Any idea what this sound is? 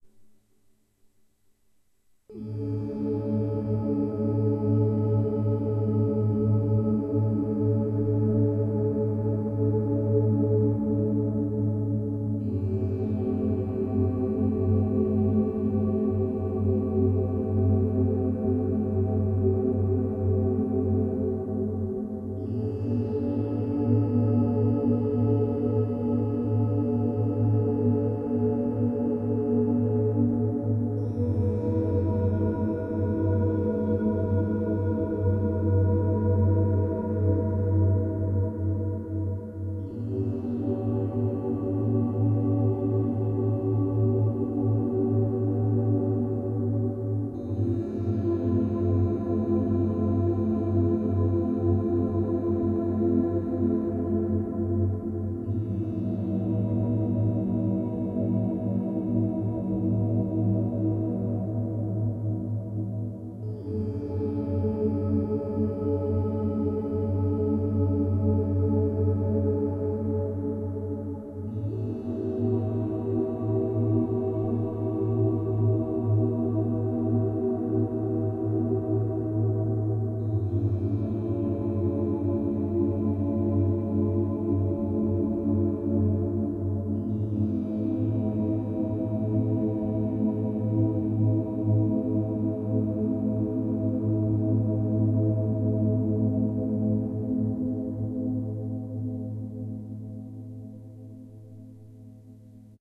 relaxation music #7
Relaxation Music for multiple purposes created by using a synthesizer and recorded with Magix studio.